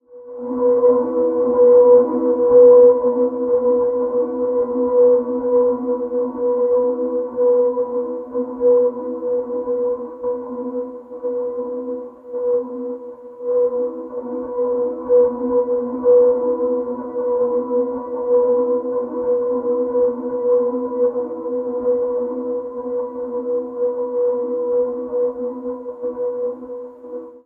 Making weird sounds on a modular synthesizer.